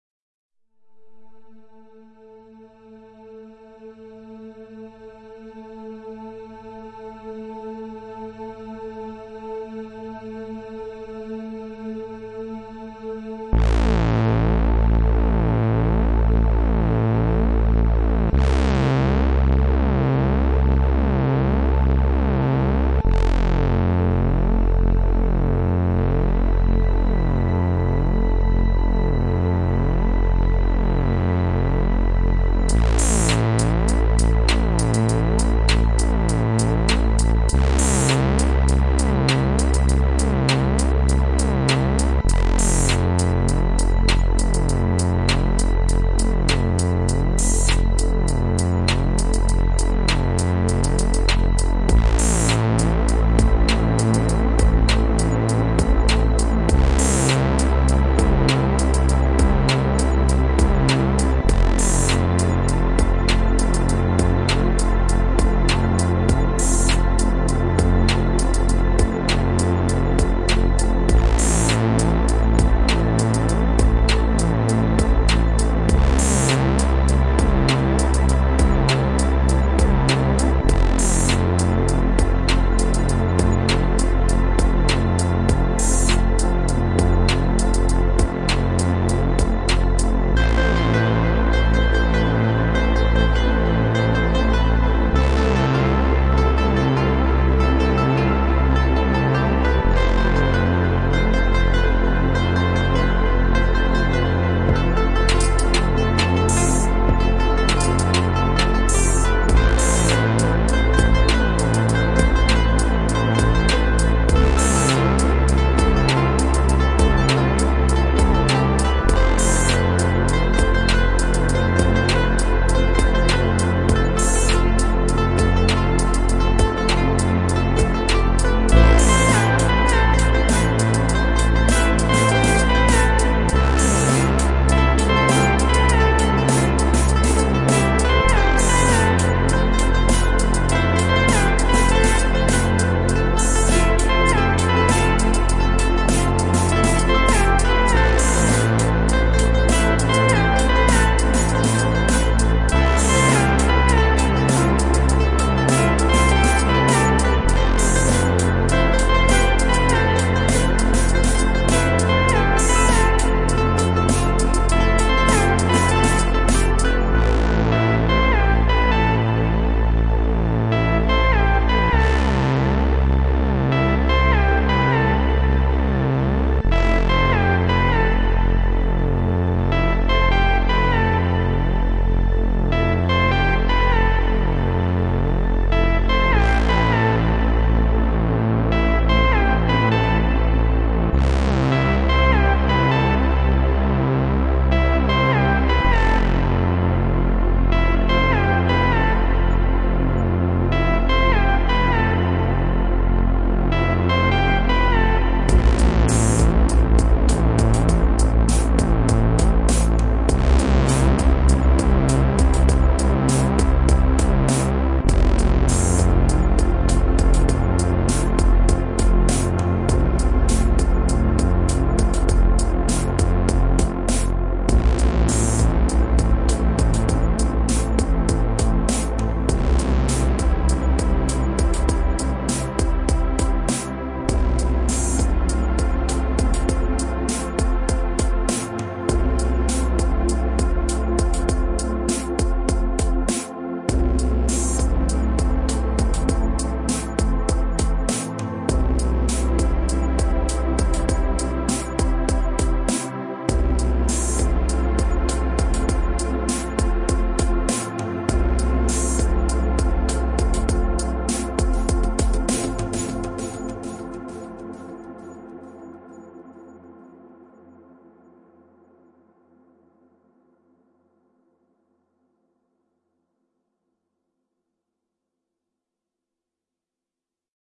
A ticket from Earth
adventure, alien, ambient, analog, astro, cosmos, dream, edm, effect, electronic, fun, future, groovy, idm, laser, moog, movie, music, sad, science, sci-fi, soundesign, soundtrack, space, spaceship, strange, synth, tension, weird